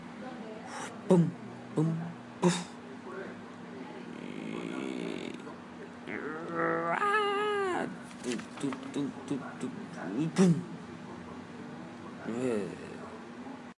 sounds with mouth and ambience noise